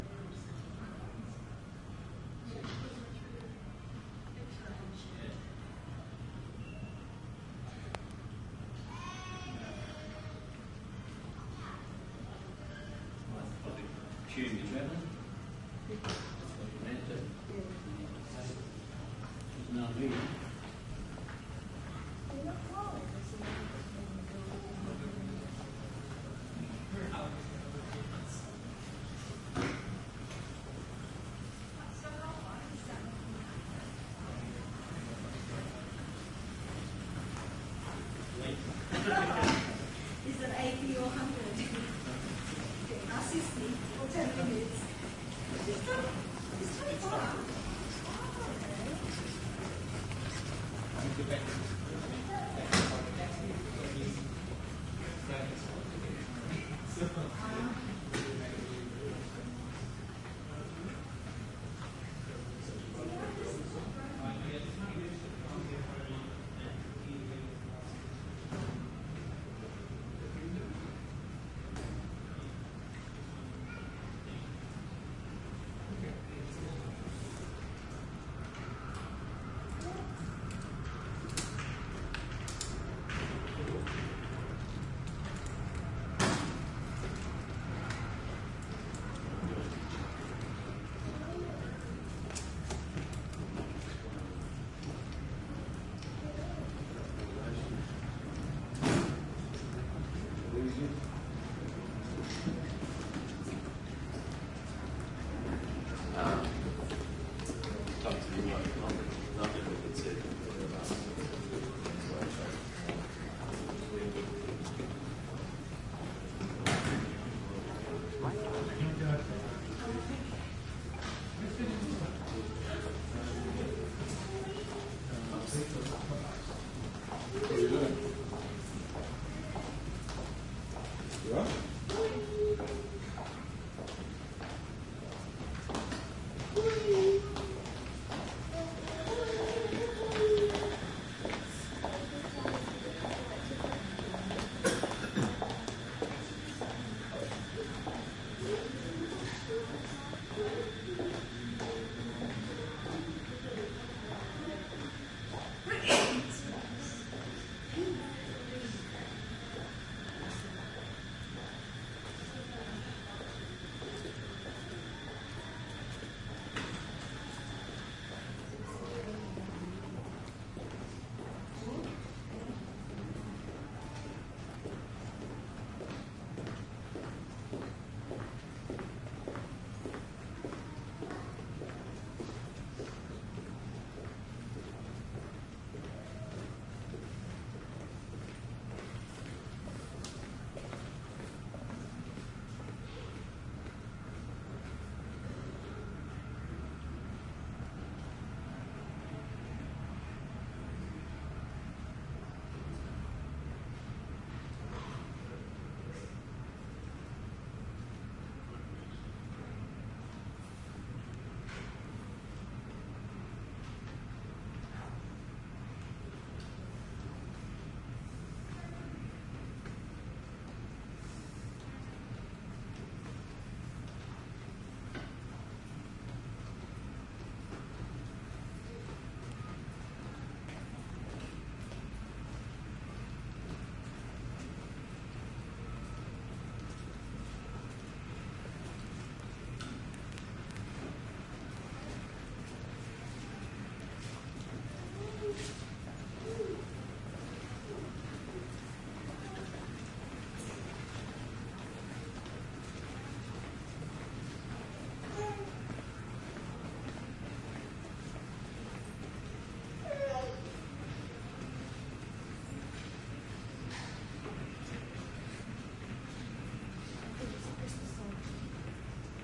Airport Passage Brisbane 4
Ambient sounds of people passing on a hard floor in a long passage between a terminal and the main airport lobby. Recording chain: Panasonic WM61-A microphones - Edirol R09HR